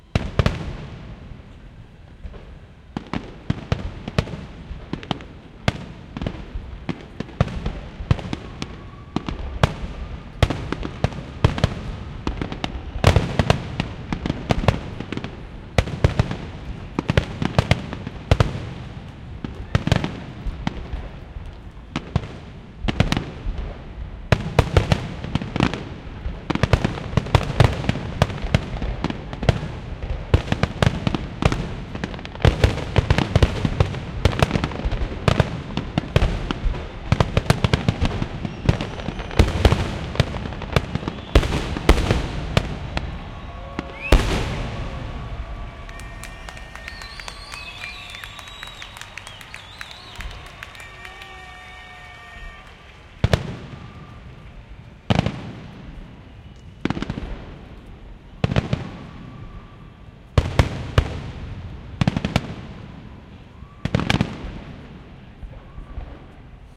fireworks climax middle Montreal, Canada
Canada, climax, fireworks, Montreal